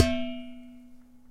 Hitting a large pot lid
pot, bang, hit, kitchen, lid, metal